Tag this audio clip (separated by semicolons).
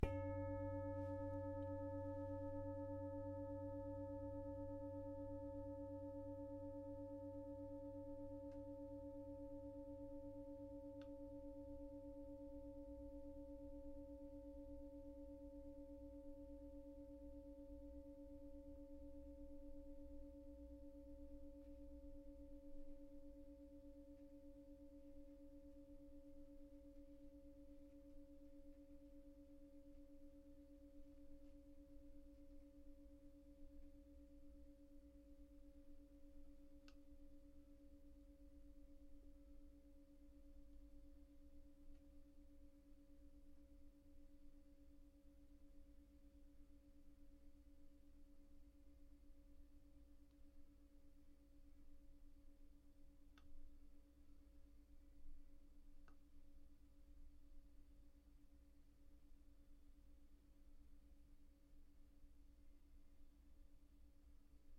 bowls; tibetan; singing